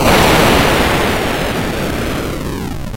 SFX Explosion 09
retro video-game 8-bit explosion
8-bit, explosion, retro, video-game